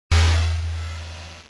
Drones Exploding
Game, Alien